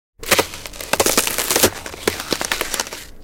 Ice 4
Derived From a Wildtrack whilst recording some ambiences
BREAK, cold, crack, effect, field-recording, foot, footstep, freeze, frost, frozen, ice, snow, sound, step, walk, winter